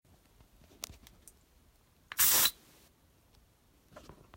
Aerosol Spray Can Sound.
Recorded with iPhone 7.